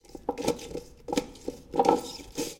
Sound of a toilet brush cleaning itself in its container.
Sounds as brushing plastic several times.